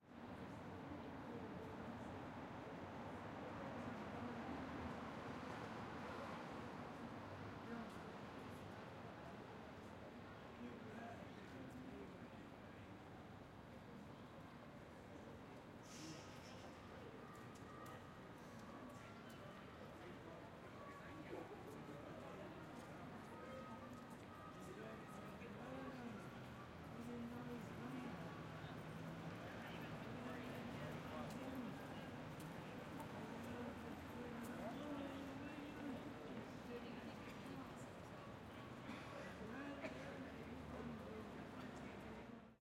Crowd Noise Night 4
A selection of ambiences taken from Glasgow City centre throughout the day on a holiday weekend,
Ambience, City, crowd, Glasgow, H6n, people, Street, traffic, Walla, Zoom